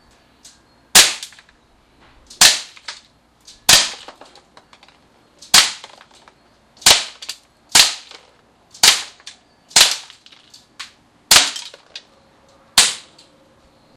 Shooting the new Powerline 15XT into carboard boxes loaded with various objects for impact sounds recorded with DS-40.
gun, air